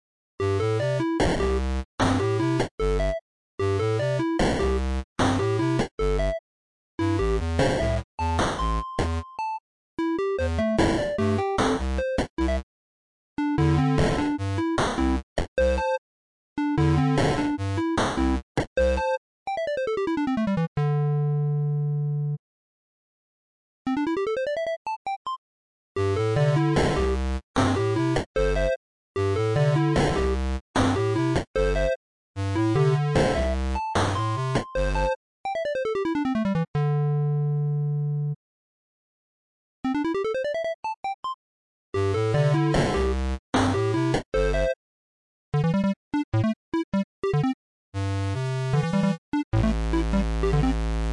This sound is part of a series of Level sounds, sound effects, and more, all 8 bit and 80s theme
Used as a fast pace or end of a level of a game, but you can use this in whatever way you want!
and my twitter1
8-bit, chiptune, Field-recording